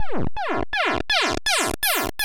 Fading-in pews
Pews that fade in at the beginning. Can be used when you complete certain tasks in a pinball game. Created using SFXR
8-bit, console, game, games, old, sfx, sfxr, sounds, video